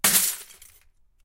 glass-in-bucket 01
Glass shattering/breaking sound in a bucket. Could be used as a drum sound for an industrial beat.
break, crack, crunch, drum, explode, glass, percussion, shatter